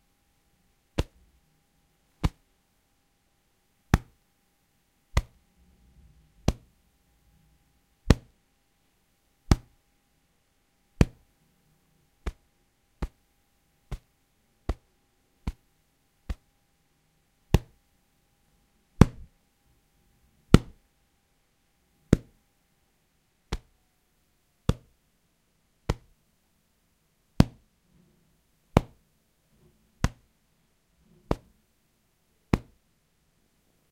Various sounds created by hitting a pillow. I used it for soccer kicks. Recorded with a Zoom H2n Handy Recorder.
soccer, hit, kick, impact, ball, football, various